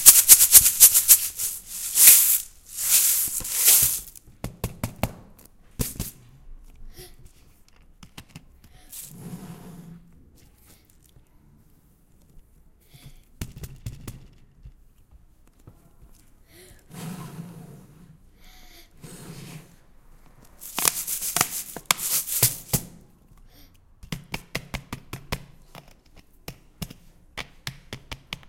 Plastic bottle with rice